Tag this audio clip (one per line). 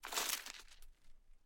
ball
crumpled
paper
wastepaper